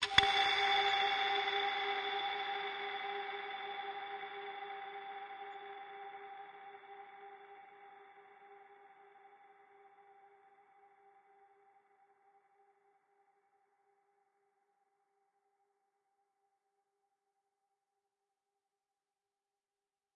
This is one 'clonk' on an anklung- an Indonesian bamboo percussion instrument. There is reverb ambience on this recording. This is one of the samples I made while putting together the music for Horse + Bamboo Theatre's show 'Little Leap Forward' in 2009.